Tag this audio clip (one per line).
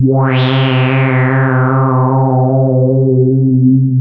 evil
horror
subtractive
synthesis